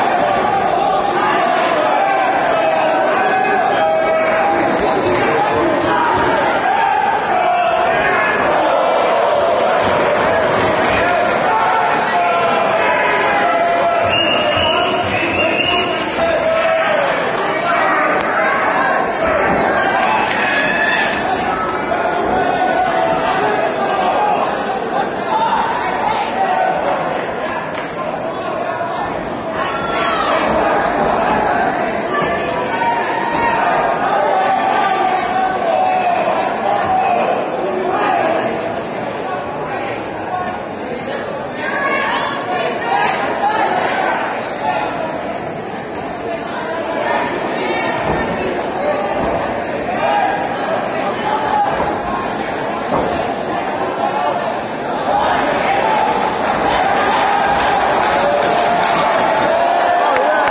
Fight Arena 5
Crowd noise at a MMA fight. Yelling and English cheering sounds. Part 5 of 5.